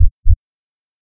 Single heartbeat loop.
heartbeat, loop, pulse